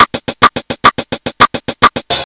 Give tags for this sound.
beat; casio; dance; drum-loop; percs; percussion-loop; rhythm

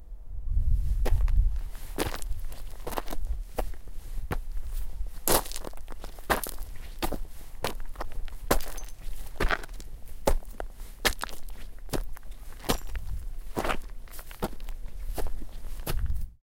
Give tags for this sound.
field-recording; footsteps; gravel; hill; steps; tarmac; walking

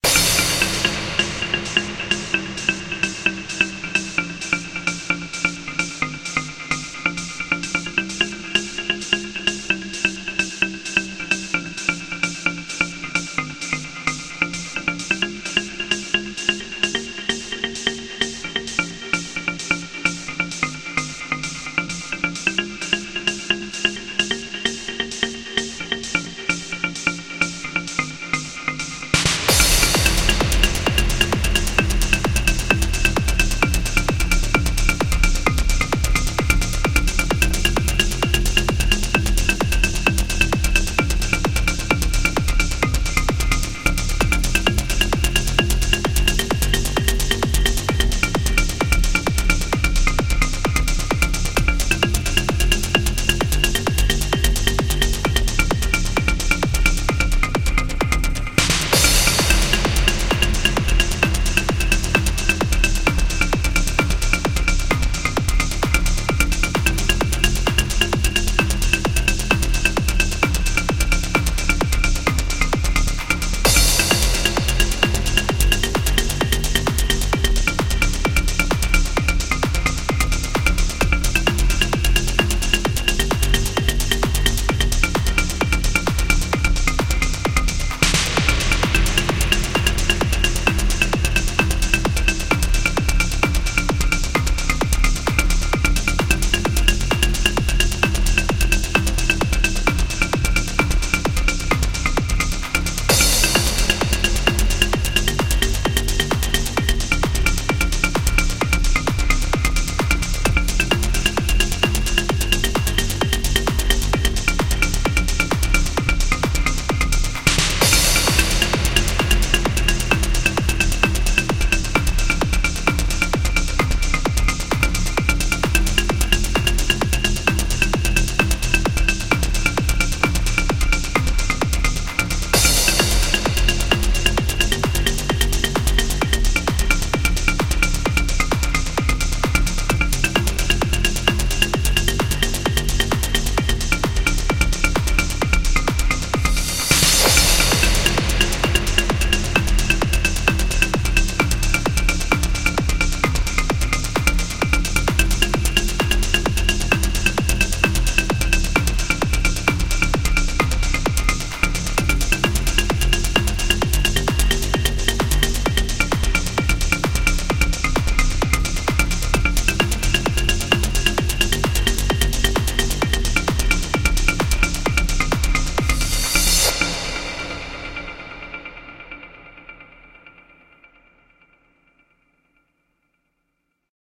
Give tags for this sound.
bass-music; club; dance; kris-klavenes; rave; techno

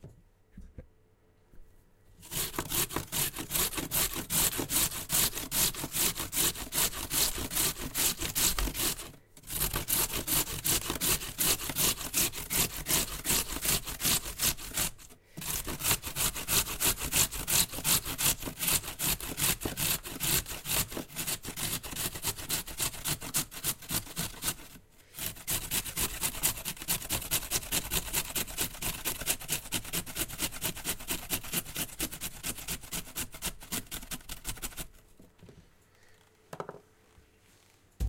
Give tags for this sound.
food; plastic; percussive

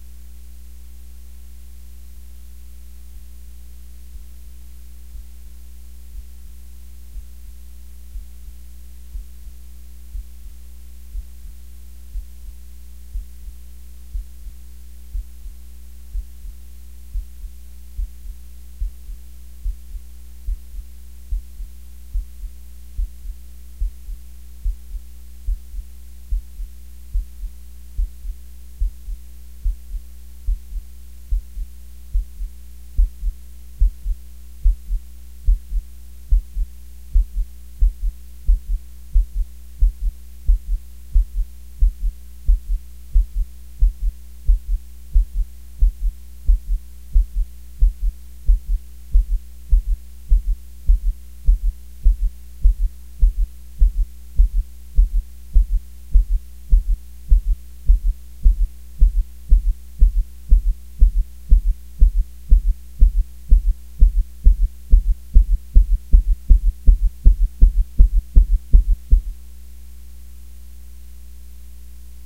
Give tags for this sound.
Abyss; Ambience; Atmosphere; Dark; Darkness; Drone; Footsteps; Underwater

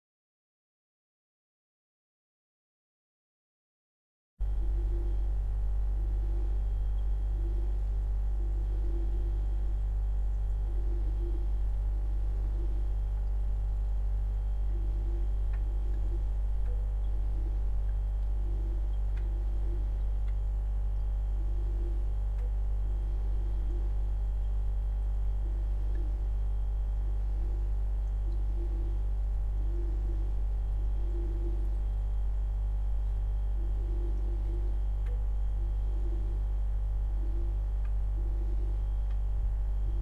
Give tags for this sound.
ambiance; ambience; ambient; atmosphere; background; background-sound; field-recording; fridge; noise; rumble; running

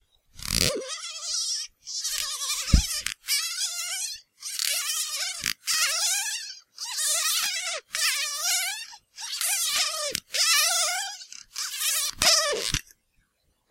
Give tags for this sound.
turning; truck; wheels; rubber; toy; car

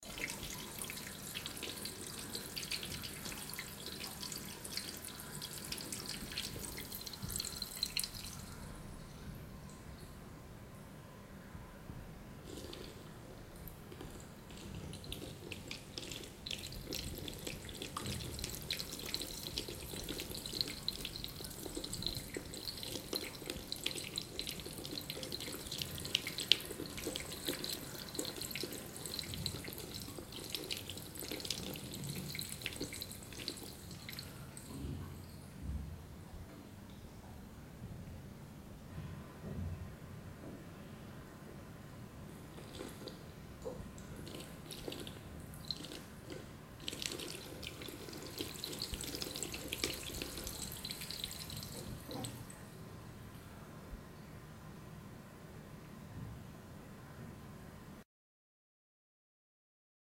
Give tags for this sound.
Bubble; H2n; Pipe; Water

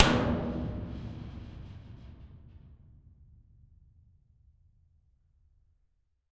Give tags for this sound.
cistern; metal; percussion

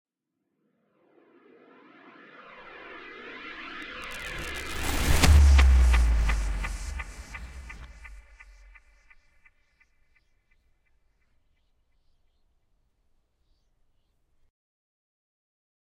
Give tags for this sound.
woosh; film; effect; hits; fx; sound; garage; boom; effects; cinema; design

